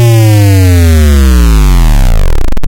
A low freq square wave bent down.